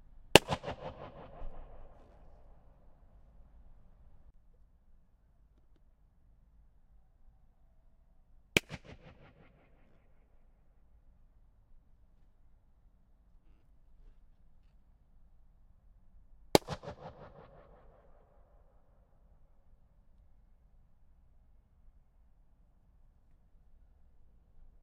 Three balloons burst under a brick arch in Castlefield, Manchester which displays the same physics as Echo Bridge, MA